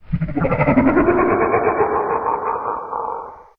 A self-made evil laughter of a fictional monster/hound I ever had a nightmare about.